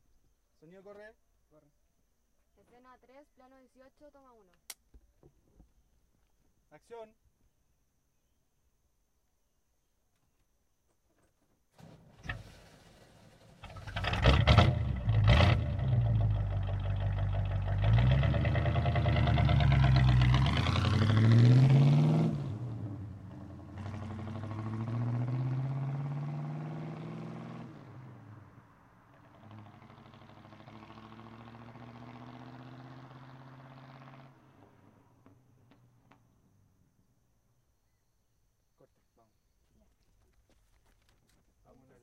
Taken from location sound during the making of a short film.
Recorded on a Tascam DR40 with Audix F9 Condenser and Rode Windscreen.
This is a 1974 Camaro taking off in a rural road in central Chile.
Sound includes clapboard in the beginning.